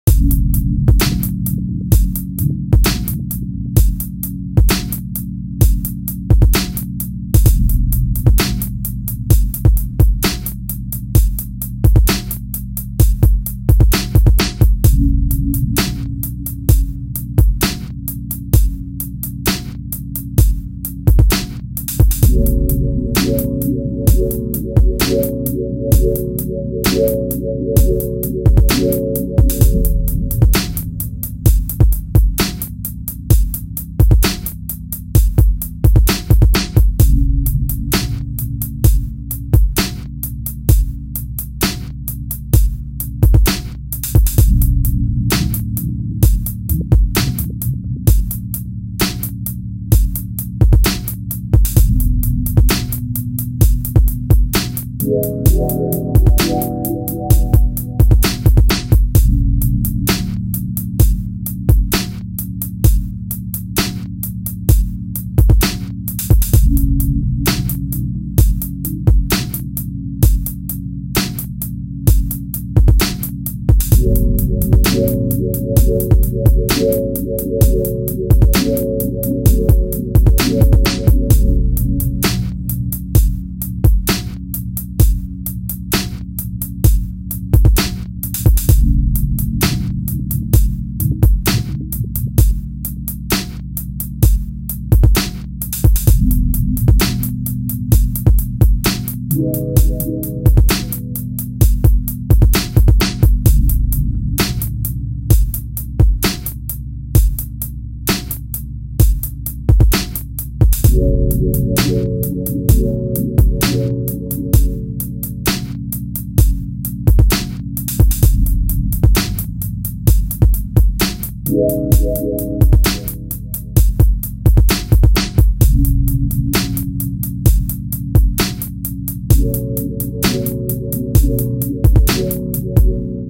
Hip Hop beat Chill , calm, music
beat, calm, chill, hip, hop, music